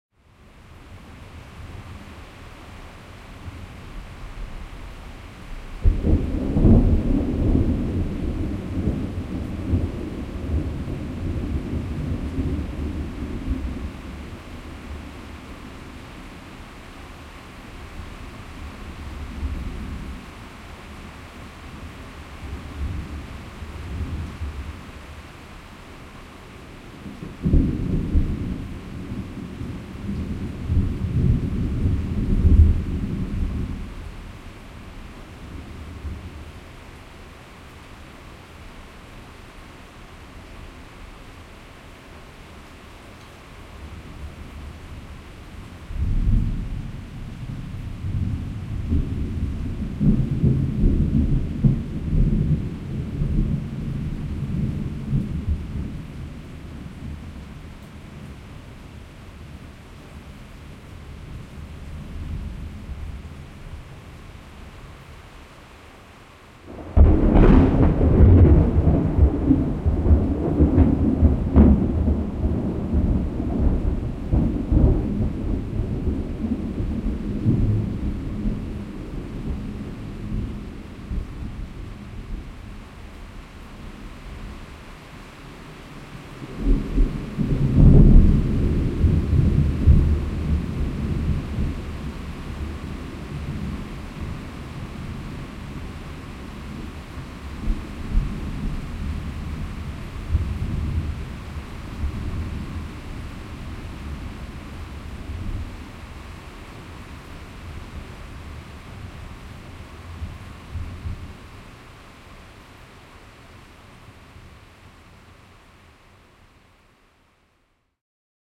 It is night and a storm is approaching the shore, several distant thunders.
distant, Talamanca, coast, thunders, night, wind